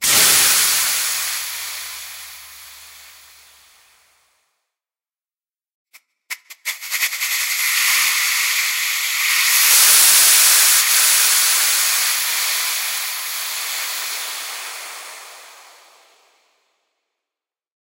Air (or steam) pressure release
Studio recording of a 10'' inflatable Stand-Up surf board being deflated. I included a roughly designed version first, and the raw mono take.
Neumann TLM-102
Audiofuse Interface
air, air-pressure, cooker, deflate, piston, poison, pssst, release, steam, train